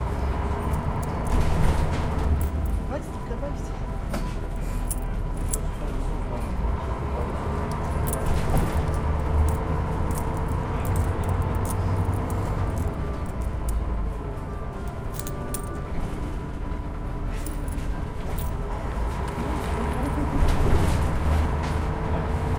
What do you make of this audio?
Inside-bus-departure
Bus departure from Ikea shop stop. Atmosphere inside the bus.
Recorder: Tascam DR-40.